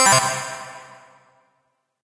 Reverb On Some Tones